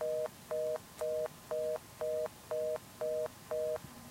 The American reorder tone, or the fast busy signal, is the congestion tone or all trunks busy (ATB) tone of a public switched telephone network.
Note: this is not the busy signal! I know they sound similar, but they are different things!
american, beep, busy, busy-signal, phone, reorder, signal, telephone, tone